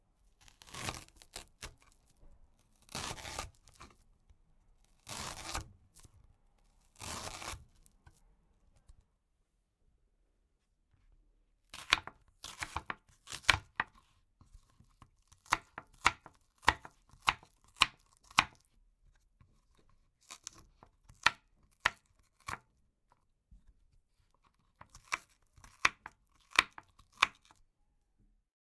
Sound of a knife cutting an onion